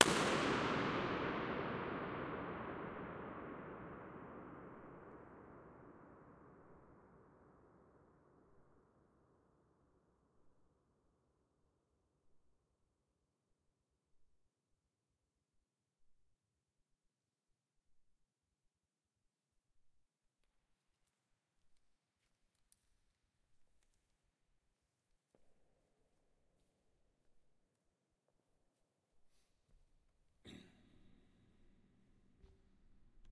Balloon burst 3 in Emanuel Vigeland mausoleum
A balloon burst in the Emanuel Vigeland mausoleum, Oslo, Norway.
architecture, balloon, burst, Emanuel-Vigeland, mausoleum, norway, oslo, reverberation